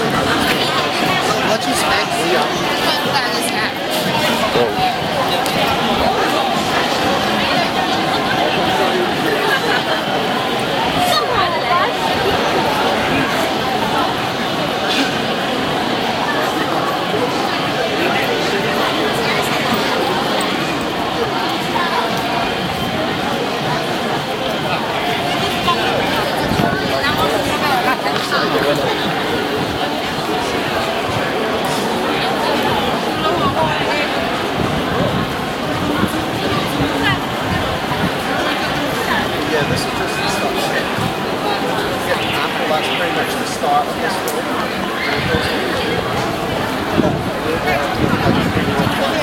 Shanghai Street
Recorded while walking on Nanjing Road East, Shanghai.
busy, city, English, field-recording, Mandarin, talking, tourists, traffic, urban, voices, wind